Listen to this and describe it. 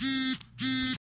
phone vibrate
Phone recorder app captures the phone vibration.
mobile, phone, recording, vibrate